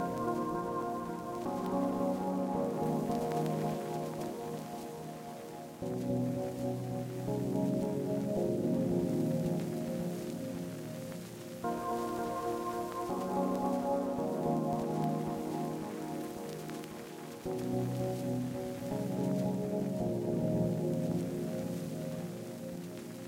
dnb liquid progression (consolidated)
Same as the other one with vinyl cracks and pops, as well as hiss
ambient, jungle, vinyl, liquid, drum-and-bass, atmospheric, pad